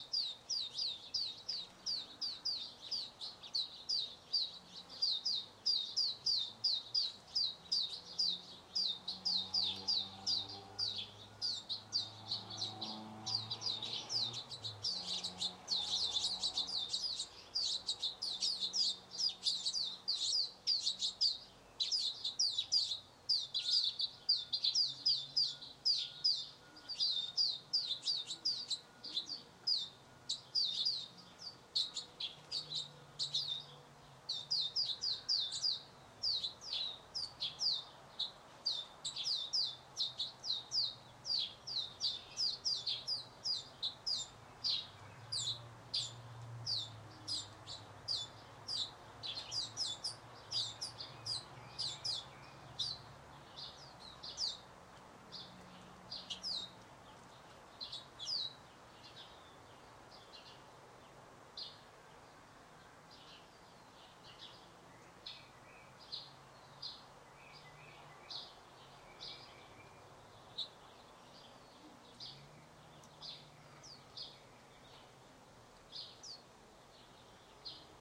This was recorded on a small sony dictation recorder called the ICD-UX560F with it's built-in mic. The scene used was the "The Auto Rec" with the mic Sensitivity at medium.
The original recording was separated into two parts. Hopefully this will be use.